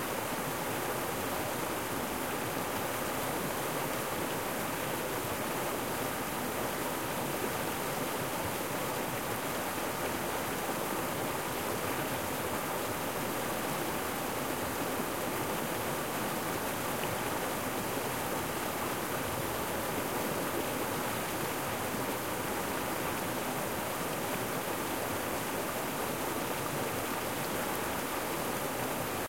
Ambiance (loop) of a waterfall.
Other waterfall's sounds :
Gears: Zoom H5